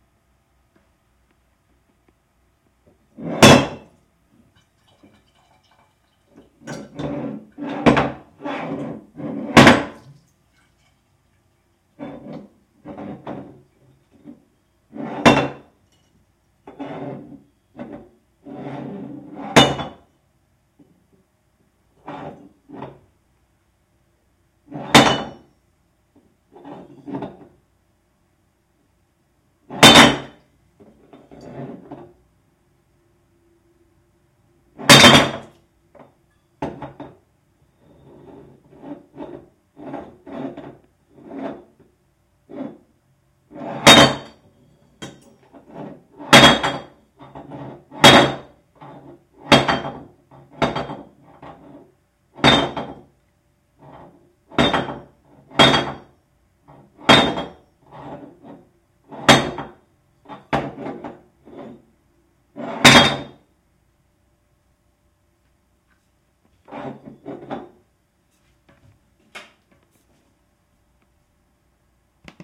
Beer Bottle Falling Down - Over
I needed a beer bottle falling over. Recorded on a Samsun studio condenser mic.
Falling, Over, Bottle, Beer, Tipping